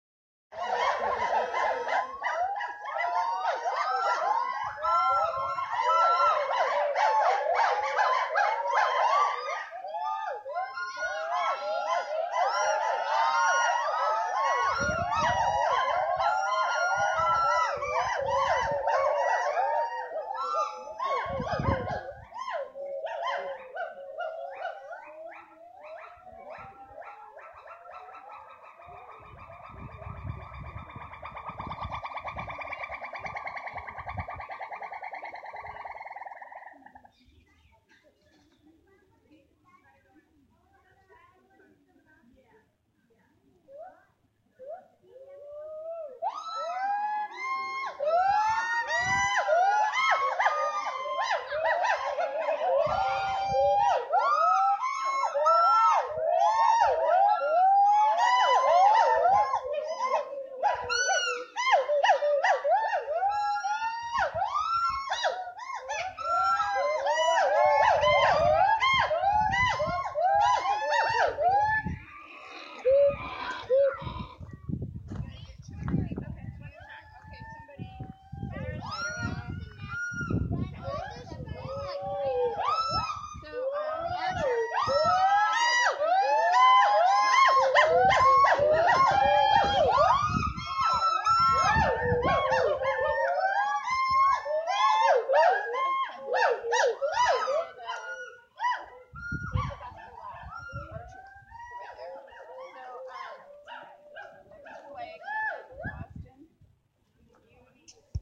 Recorded with an Alcatel OneTouch Flint at a Gibbon sanctuary in southern California. Gibbons are matriarchal, and each group has its own call. You can hear some other visitors of the sanctuary in addition to the gibbon calls.